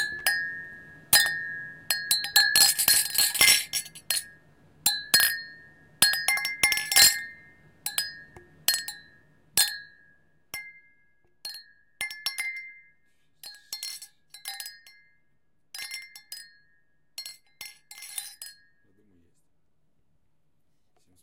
INT CLINK GLASSES
champagne, clink, glasses, party, time